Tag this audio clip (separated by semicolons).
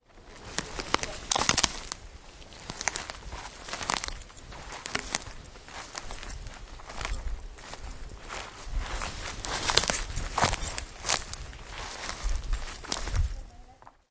brushwood; floor; forest; step; steps; walk